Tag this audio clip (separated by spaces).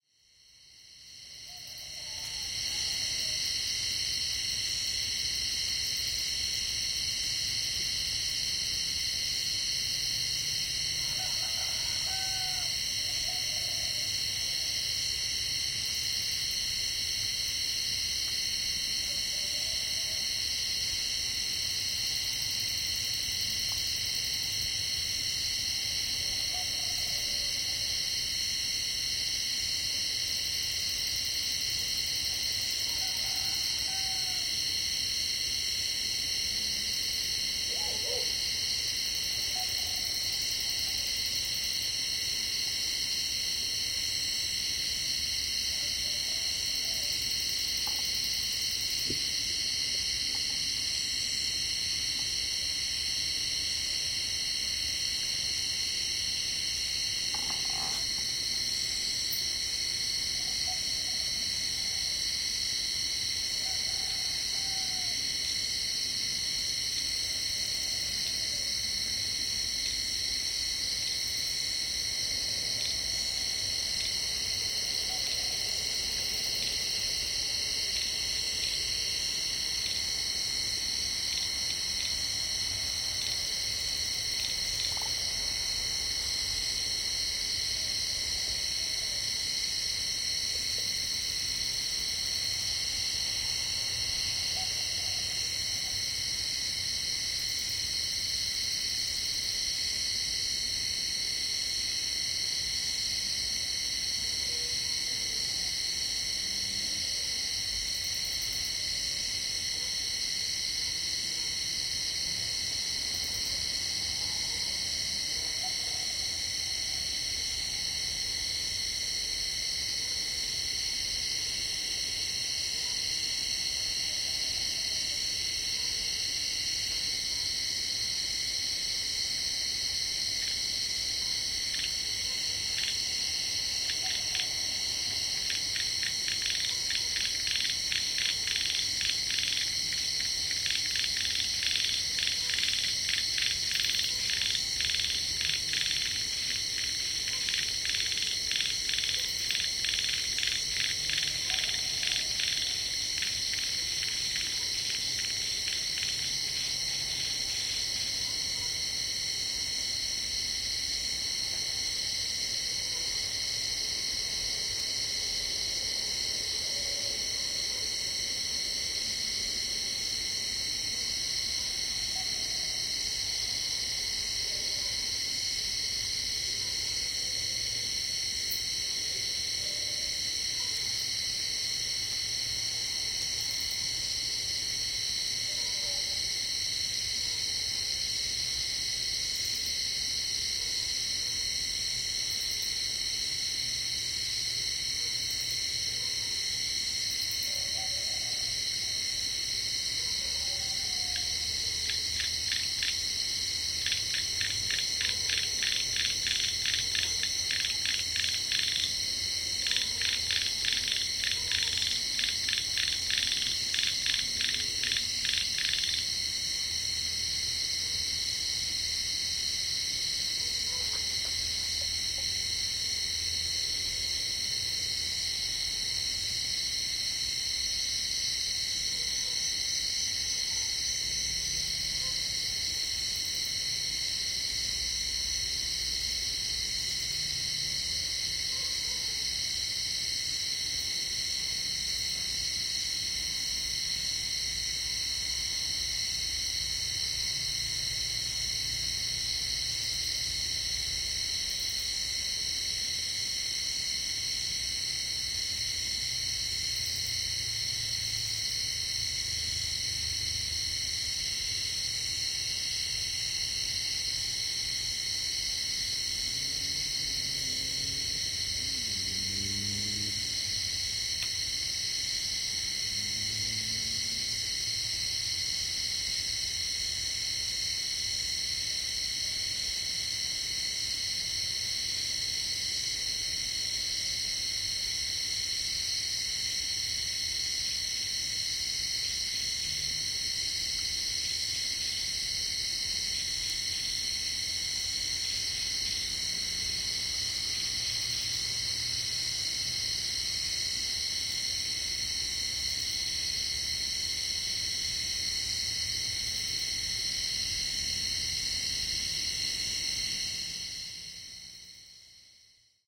birds field-recording frogs